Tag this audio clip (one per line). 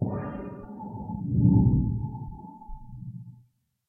tin
plate